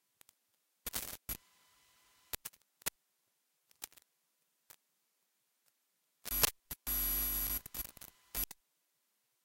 it's some noise from my mic.